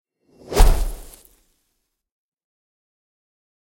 Spell shoot 2
Heavily relying on granular synthesis and convolution
broken, destoryed, impact, loud, magic, shoot, shot, spell, wizard